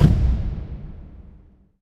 Large drum strike, suitable for film, film score, trailer and musical tracks.
Made by closing a car door in an empty underground parking, with some eq and dynamics processing.
drum, film, large, movie, strike, trailer